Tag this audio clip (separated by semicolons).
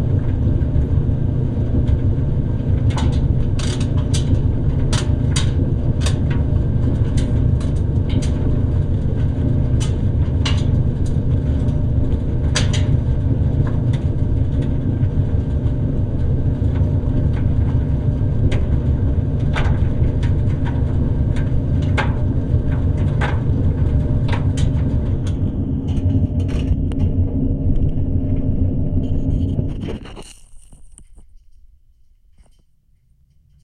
contact-mic; drone; hum; humming; machine; mechanical; noise; whir; whirring